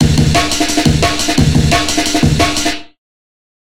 amen break with an amp effect applied